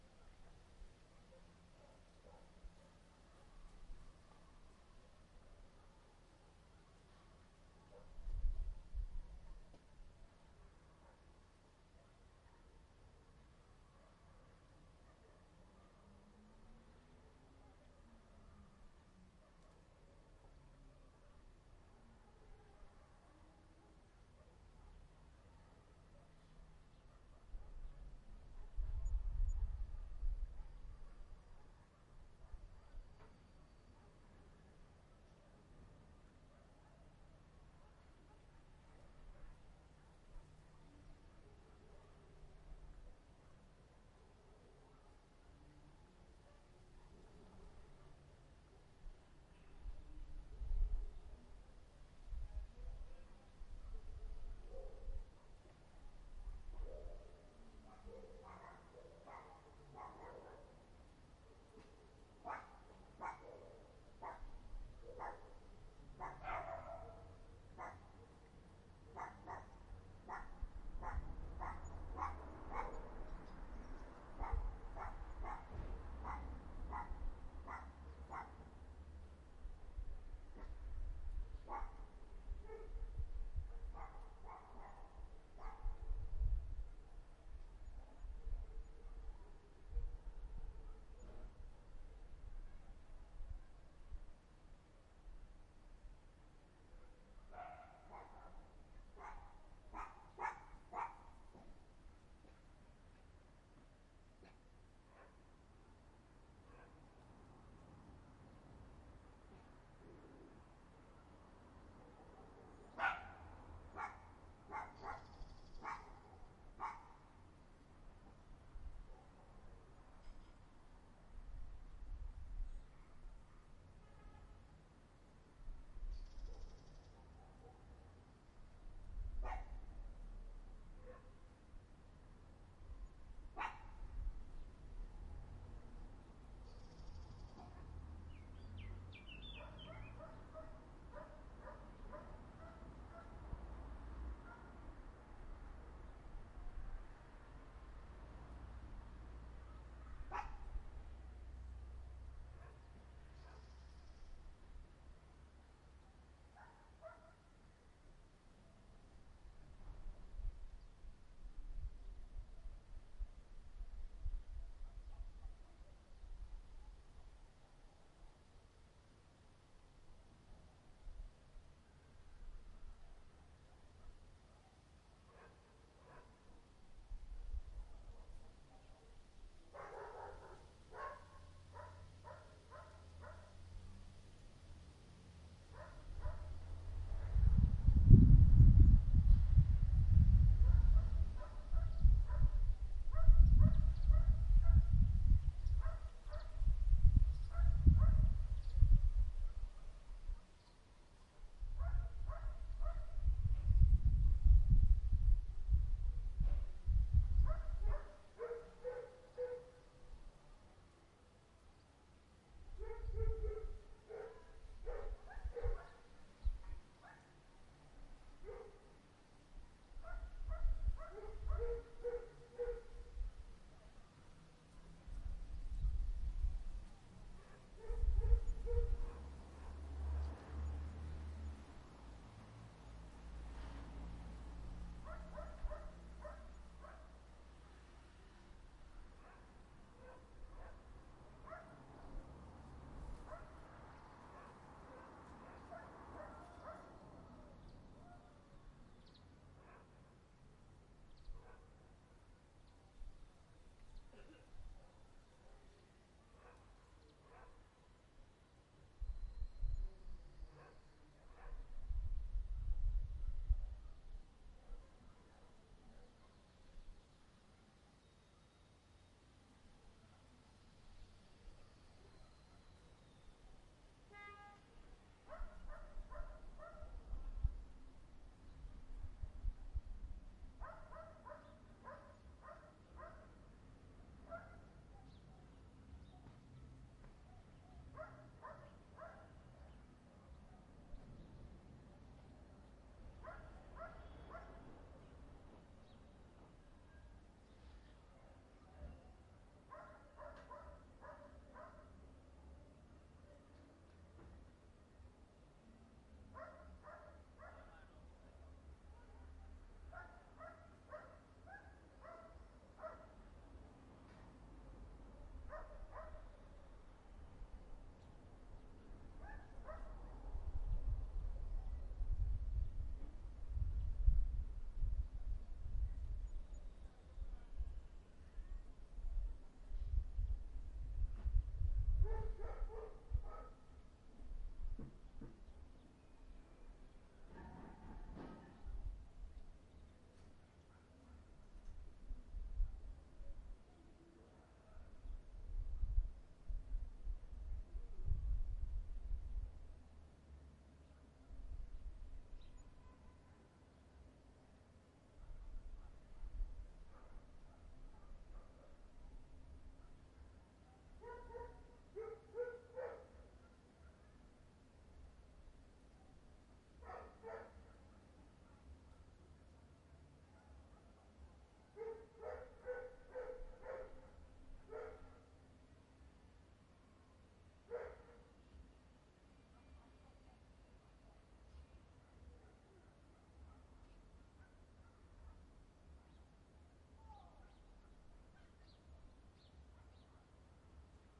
Village ambience, Portugal, near Sintra. 19 August 2016, round 19:00.
Recorded witha Zoom H1 with windshield. Despite that there is some wind noise.
Plenty of sounds in the distance: birds, dogs, a few distant cars and the odd voice.
ambiance, birds, cars, countryside, dogs, field-recording, rural, village